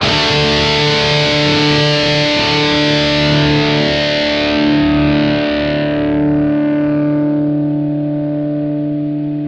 11 Dist guitar power d long
Long d power chord - Distorted guitar sound from ESP EC-300 and Boss GT-8 effects processor.
distorted, distortion, guitar, power-chord, rhythm-guitar